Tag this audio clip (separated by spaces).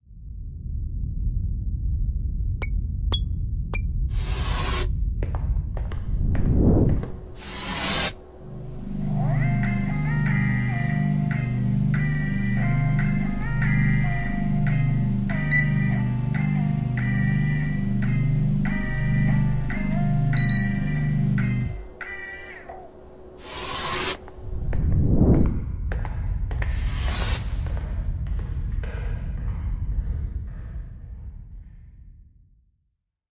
beeping close command doors elevator enter music open science-fiction sci-fi synth technology whirring